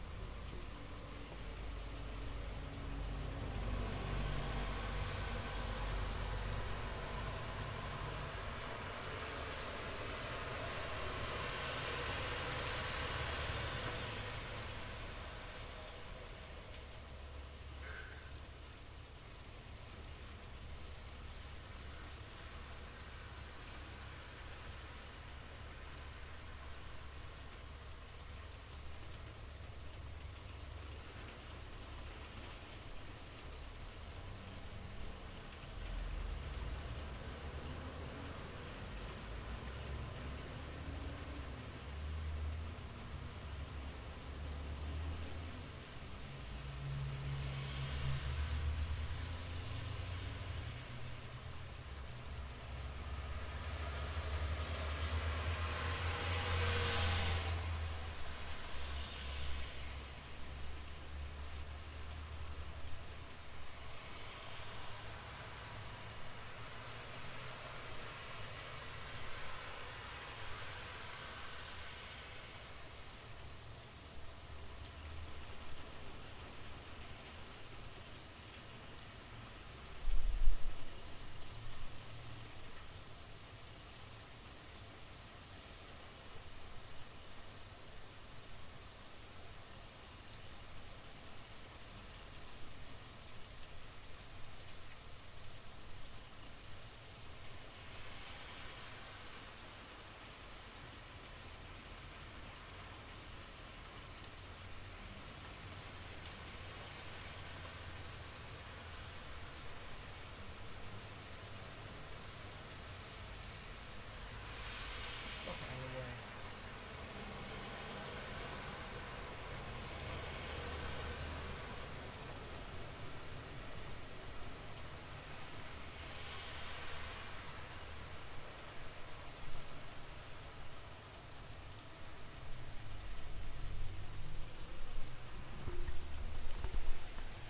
Nature - Trees with wind and occasional cars going by 1
Trees blowing in the wind with occasional nearby traffic.
blow, branches, forest, nature-sound, trees, leaves, blowing, nature, field-recording, traffic, cars, tree, windy, breeze, wind